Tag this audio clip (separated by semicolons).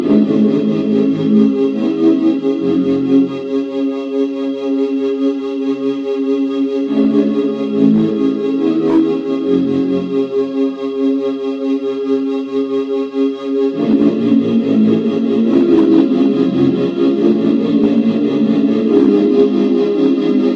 pink; y; o; thumb; k; deathcore; fuzzy; l; processed; small; e; h; t; glitchbreak; love